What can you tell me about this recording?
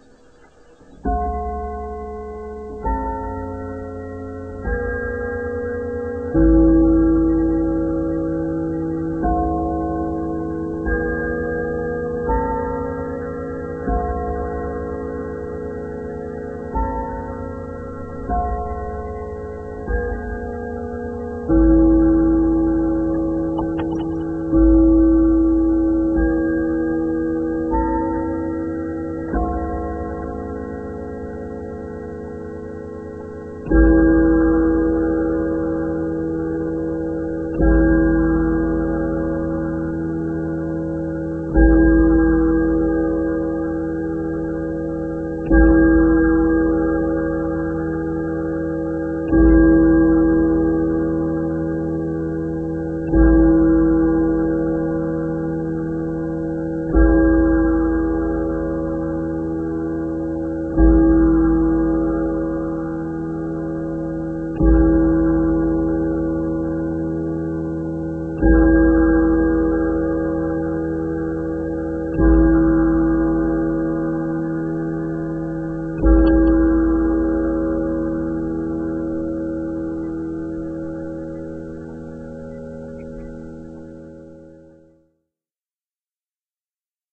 Chime 01 Hour 12 Mellowed
Mantle clock striking 12. Recorded on Tascam DR-1 with Tascam TM-ST-1 microphone. Mellowed, echoed a little, slowed ~50% Audacity 1.2 Beta (Unicode).